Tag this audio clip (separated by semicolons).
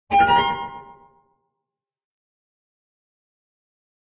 games; stars; IndieDev; arcade; indiedb; video